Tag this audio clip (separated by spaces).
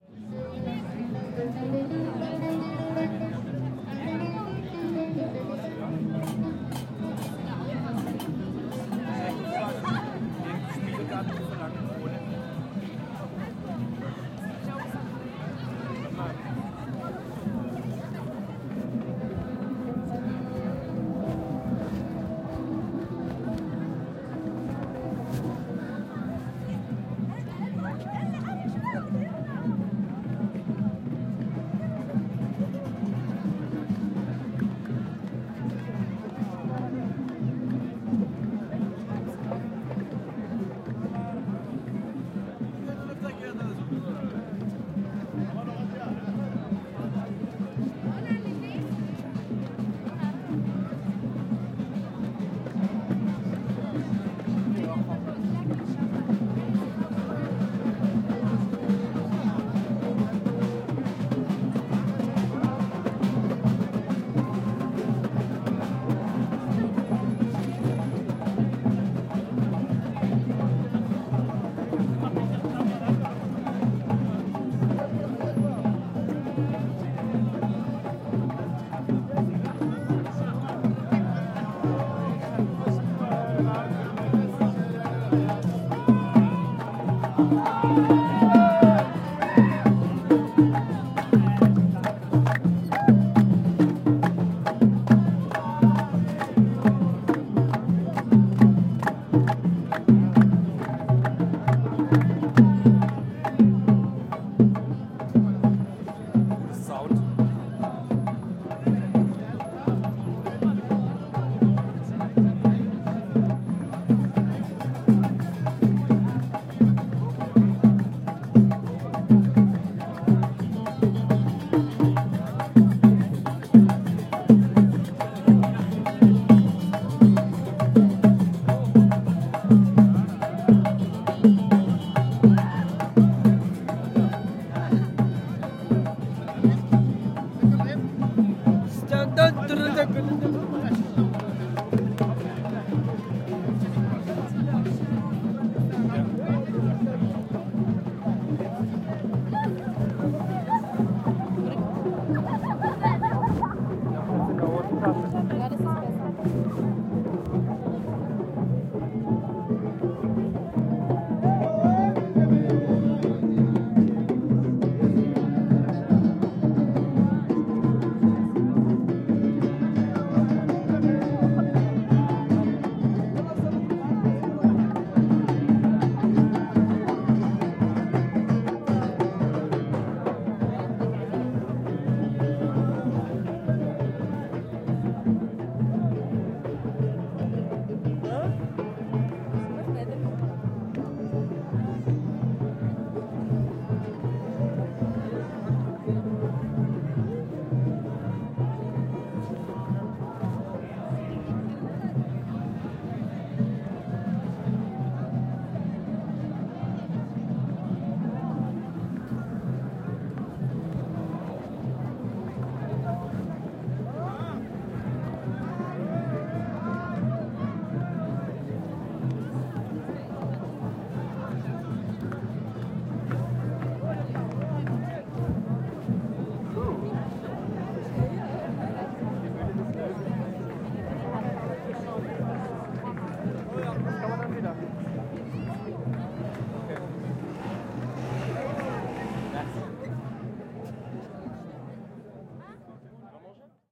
Atmosphere Fna Marokko Marrakech north Travel